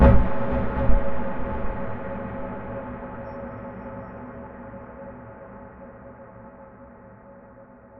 Industrial Strings Loop 004
Some industrial and metallic string-inspired sounds made with Tension from Live.
dark-ambient, industrial, metallic, strings